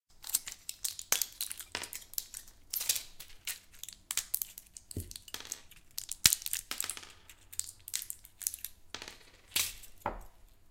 Taking the pills out of the sachet and dropping them on a wooden table. Recorded with Zoom's H6 stereo mics in a kitchen. I only amplified the sound.